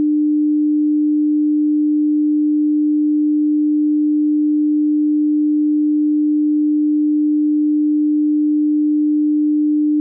300hz sine wave sound
sound, 300hz, sine, wave